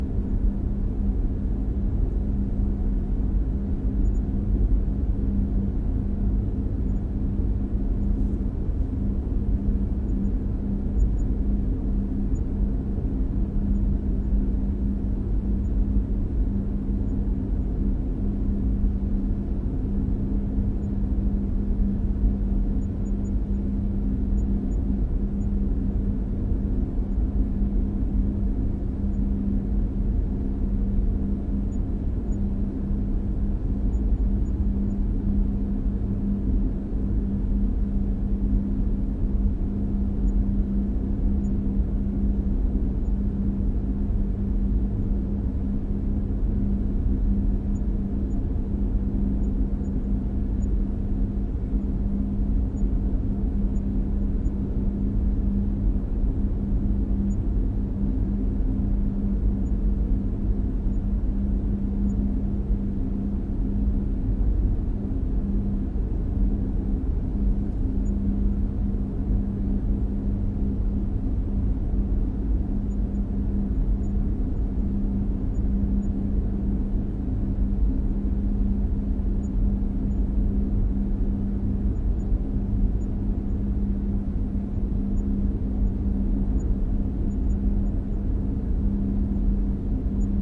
parking garage empty quiet heavy ventilation10
empty, garage, heavy, parking, quiet, ventilation